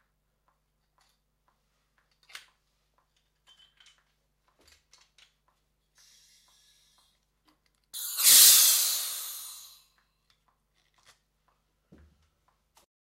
Laughing gas/nitrous oxide/nos balloon inflation audio sample #08
Inflation of nos balloon recorded on wide diaphragm condenser, with acoustic dampening around the mic but not in studio conditions - should be pretty cool for a non synthy noise sweep, or for a snare layer